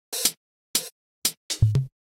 cymbols boop 120bpm-05
hihat closed hi-hat open hi-hats Loop hihats hat Dubstep step drums hats cymbals
closed, cymbals, drums, Dubstep, hat, hats, hi-hat, hihat, hi-hats, hihats, Loop, open, step